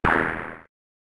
Exotic Electronic Percussion44